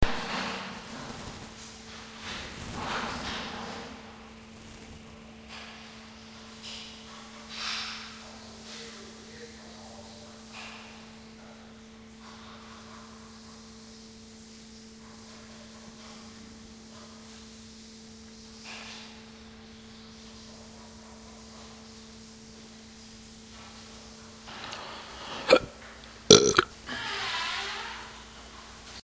georgia informationcenter burpsqueak
A rest stop just inside the Georgia border on I-95 recorded with DS-40 and edited in Wavosaur.
field-recording, road-trip